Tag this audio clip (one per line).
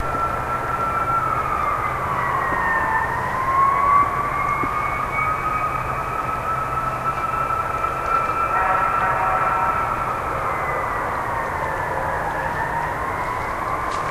fear
field-recording
hydrophone
panic
rubber
siren